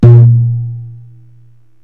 Low floor tom punk
My floor tom hit with no muffle.
punk, live, rock, drums